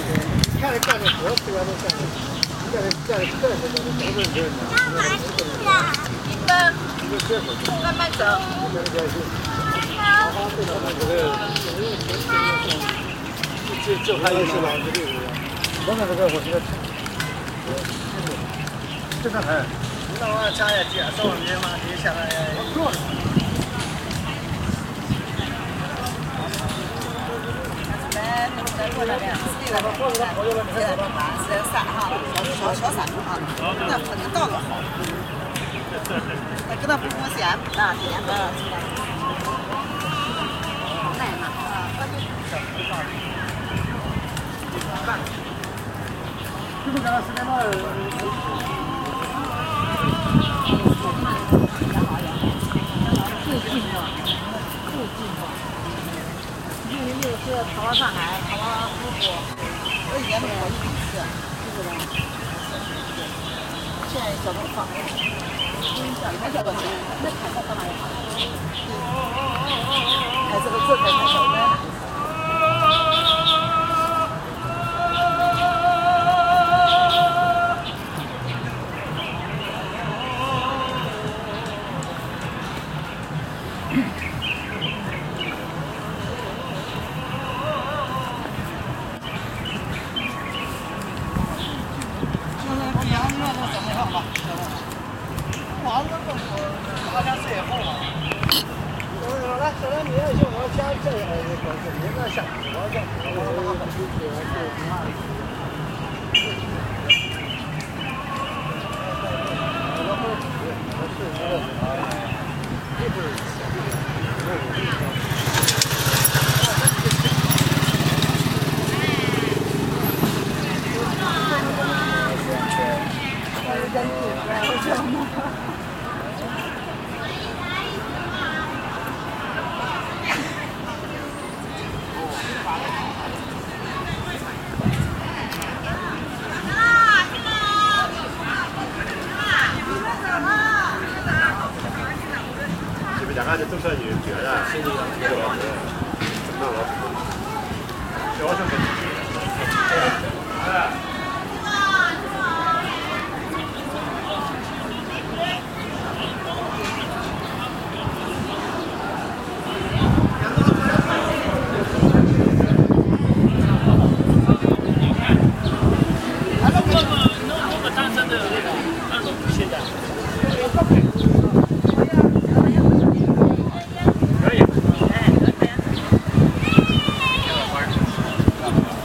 Peoples Square Gardeners
Recording of gardeners and people in People's Square using a Canon D550. The gardeners are clipping the bushes. People are walking by, talking and enjoying the park.
tourists, clip, voices